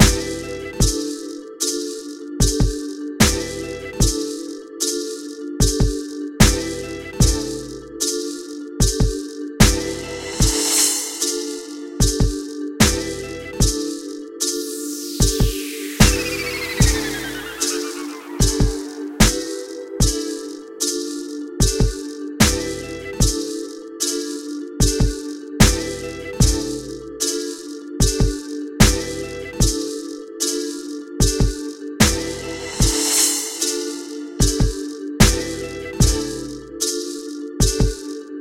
Hip-Hop Loop {Moving On}
2015, Effect, Free, Hits, home-recording, HopHop, ice, mellow, New, Orbs, recorded, Royal, sampled, Stab, Sub, Summer, Trap, Trippy, VSTi, Wave